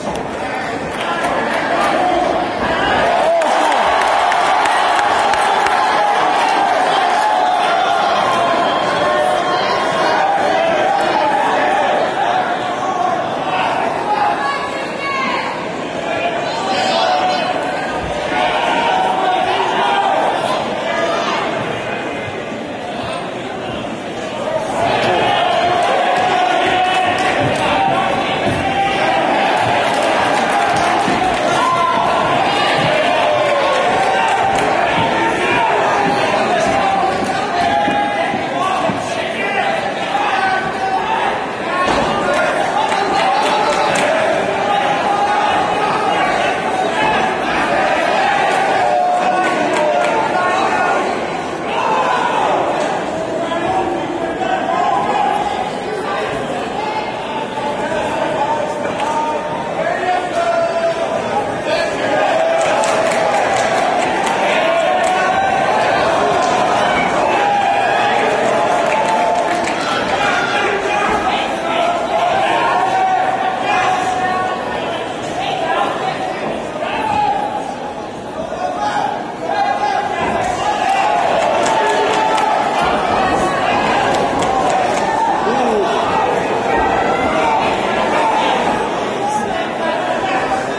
Fight Arena 4
Crowd noise at a MMA fight. Yelling and English cheering sounds. Part 4 of 5.